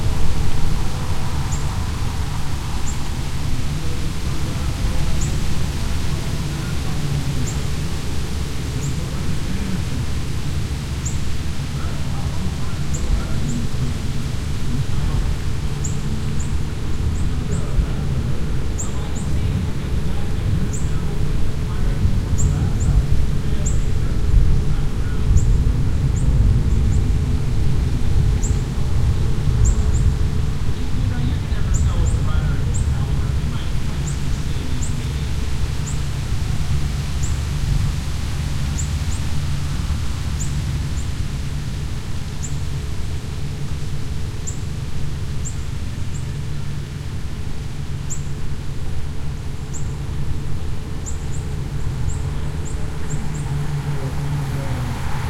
Ambience with some kind of distant machine noise, announcer in the background, couple of tweeting birds.
Part of a series of recordings made at 'The Driveway' in Austin Texas, an auto racing track. Every Thursday evening the track is taken over by road bikers for the 'Thursday Night Crit'.